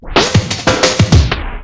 beatup beatdown
Experimenting with beats in analog x's scratch instead of vocal and instrument samples this time. It is what it's called.
dj, drum, jungle, loop, percussion, scratch, scratching, vinyl